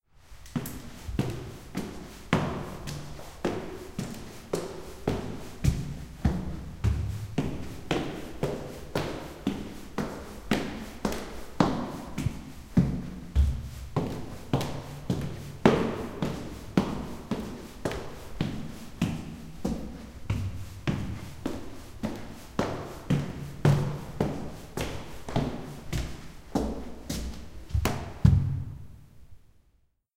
Walking Up Stairs
Slowly climbing stairs in an apartment house.
Recorded with a Zoom H2. Edited with Audacity.
climbing, climbing-stairs, feet, foot, footstep, footsteps, slow, stairs, step, steps, walk, walking